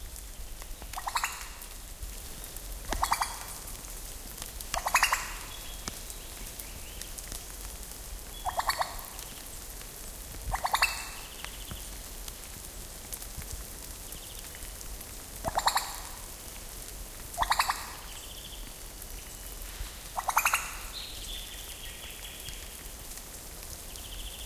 A recording of the Superb Lyrebird, near Bogong Village in Victoria, Australia. Recorded with a Zoom H1, post-production done in Adobe Audition.
bird, forest, field-recording, australia, nature